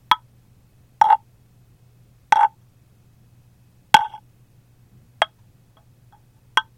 Meinl Clave
A big wooden clave (from Meinl).Recorded with an AKG Perception 220.
Percussion, one-shot, clave-sample, Wood